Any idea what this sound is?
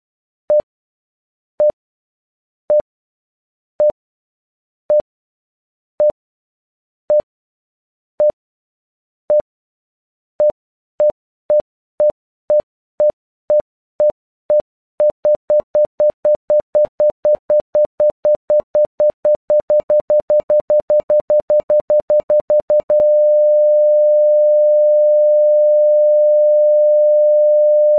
trauma and flatlining
Tones generated and timed to mimic a heart monitor speeding up, then flatlining.
I'm always eager to hear new creations!
death, emergency, hospital, medical, trauma